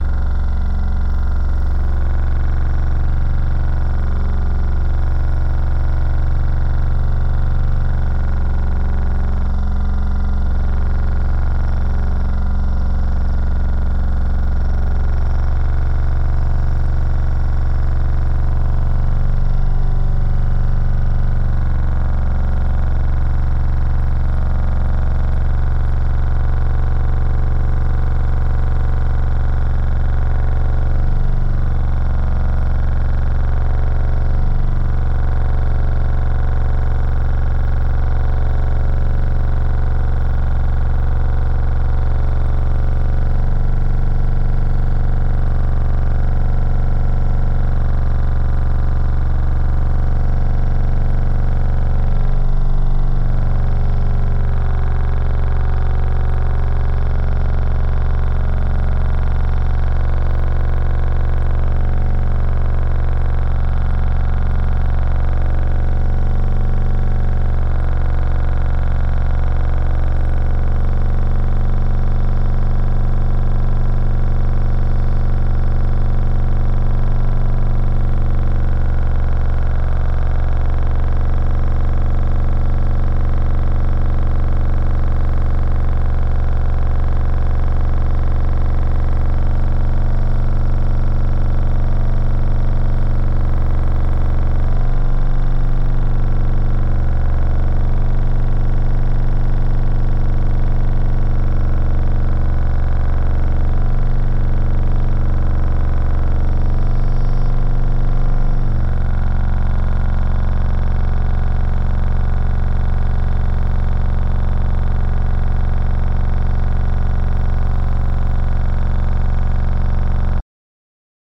Shaker Table Contact
Recording of a shaker table using three contact microphones, blended in post to give a good mix of the sounds the table was making. Variations in the tone are the result of issues with the shaker table's power supply.
Contact, Drone, Motor, Shaker-Table, Vibration